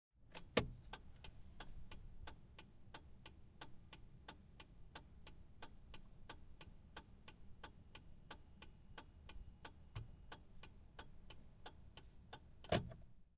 Vehicle's Turning Signal - On
Zoom H4n recording of a car's turning signal from the interior of the car.
Blinker, Car, Dashboard, Turning, Turn-Signal